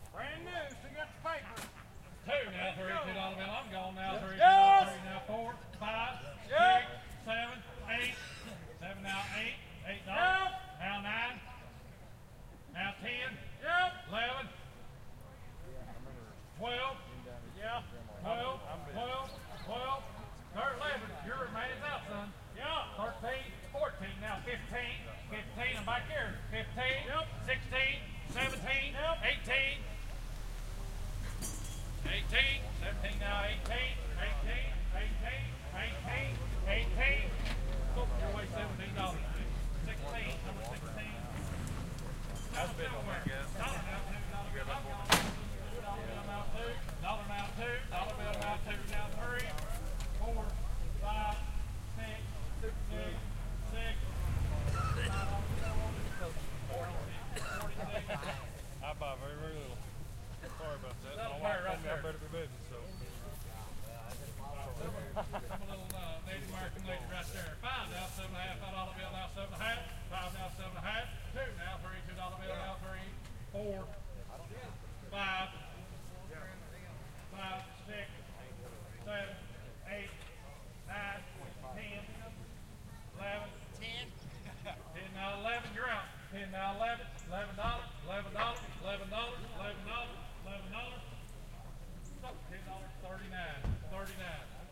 Auction Ambience 1
I went to an auction last week, and recorded some sounds with my Zoom H4n. The auctioneer is fairly close, but not close enough that you don't get any echo. Several cars drive by. People yelling "Yep!". Loopable.
sale, vocals, auction